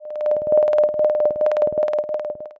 Alien Signal 3
This is a sound effect I created using chiptone.